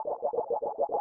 Sounds like pacman, no?